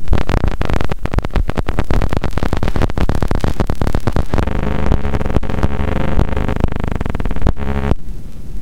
4-bar rhythmic loop created from glitchy
noises; first 2 bars are similar to each other, followed by 1 different
bar, and then a sustained less-rhythmic bar; processed with Adobe
Audition